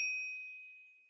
I hit a goblet with a spoon then used Audacity to 'remove noise', 'change pitch' (up) and changed tempo slightly.
bell, ching, ding, fx, goblet, ring, shing